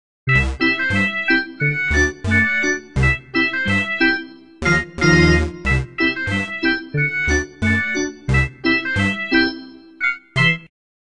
Circus theme
A simple loop, approx 11 seconds, slightly dissonant in places, 3 synth parts
carnival, circus, loop, music, synth